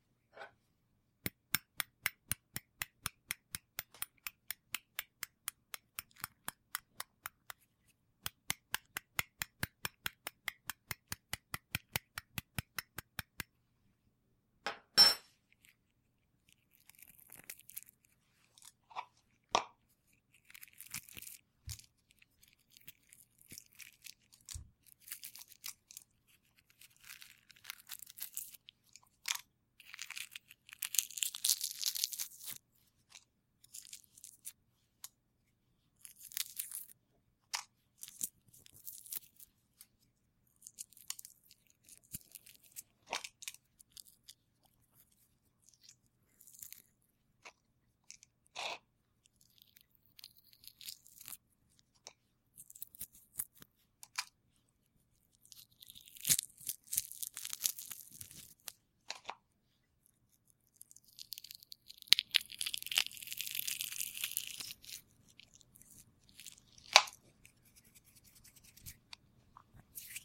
Tapping a hard boiled egg with a spoon, then peeling the egg and letting the shell fall into a plastic yogurt cup. It has been denoised using Audacity.